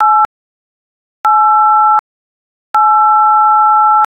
The '8' key on a telephone keypad.
8, button, dial, dtmf, eight, key, keypad, telephone, tones